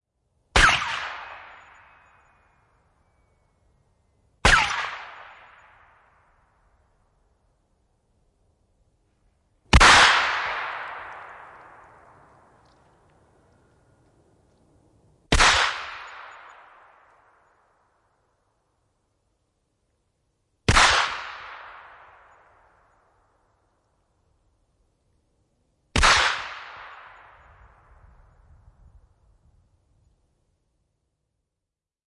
Kivääri, sotilaskivääri 7,62 mm. Laukauksia, ampumista ulkona, kaikua. Alussa mukana kimmoke.
Paikka/Place: Suomi / Finland / Vihti, Leppärlä
Aika/Date: 23.10.1984